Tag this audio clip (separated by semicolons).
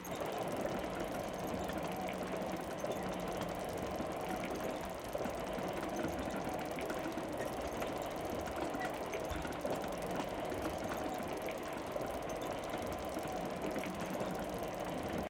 machine; squeaky; mechanical; tank; motorized